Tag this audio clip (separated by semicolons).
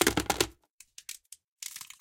dice; 120bpm; crunch; shake; 120; ice; bleach; loop; dices